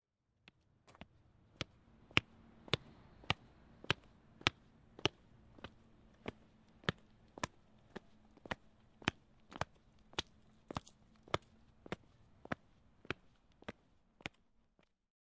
Man walking on concrete